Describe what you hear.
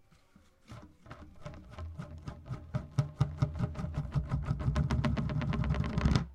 Rolling Tub - Open 01
1. Roll a small plastic tub (don't let it run, let it swing in its place)
2. Hit record
Here you go :)
This one with open side up
fx, roll, tub